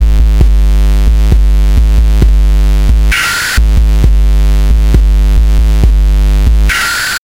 Thank you, enjoy
drum-loop, drums